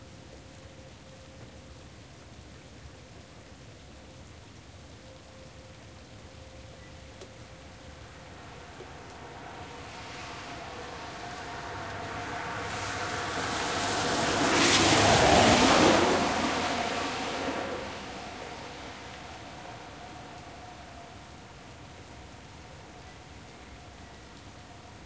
doppler coche lluvia
doppler, car, rain